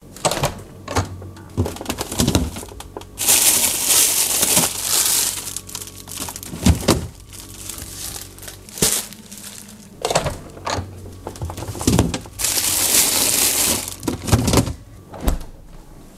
A sound effect of refrigerator being opened.